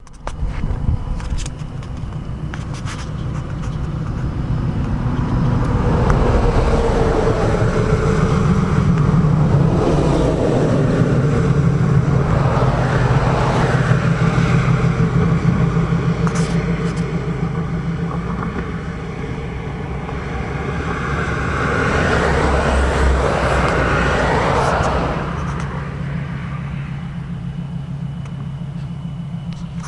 highway on mushrooms
cars, dragnoise, hell, highway